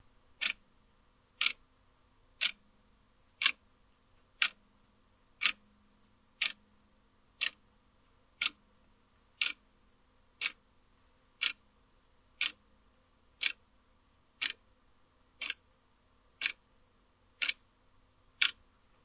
A clock ticking. can be used for ambience, mouse-over/clicking, or whatever.